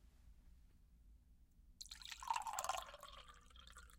OFFICE EFFECTS mugs water and papers-05
water pouring into a mug. can also be used as coffee